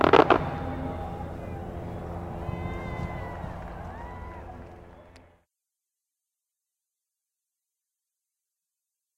hit scratch distant cheer
recording of a firework explosion with some distant crowd cheering
ecstatic, fire, fireworks, hit, outside, people